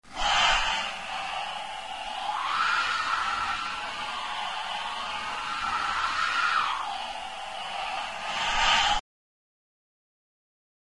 JK Portugal
Remixed human wind sound.